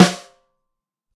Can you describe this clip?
Unlayered Snare hits. Tama Silverstar birch snare drum recorded with a single sm-57. Various Microphone angles and damping amounts.
Shot, Sm-57, Snare, Unlayered